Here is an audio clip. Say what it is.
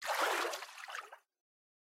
Recording of swimming.
Since the Sony IC Recorder only records in mono, I layered 3 separate splashes sounds(1 left, 1 right, 1 center) to achieve a fake stereo sound. Processed in FL Studio's Edision.